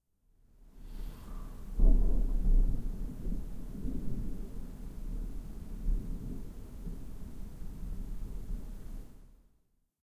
One of the 14 thunder that were recorded one night during my sleep as I switched on my Edirol-R09 when I went to bed. This one is quiet far away. The other sound is the usual urban noise at night or early in the morning and the continuously pumping waterpumps in the pumping station next to my house.